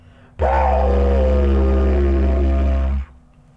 Didg Howl 3
Made with a Didgeridoo
didgeridoo, australian, indigenous, woodwind, aboriginal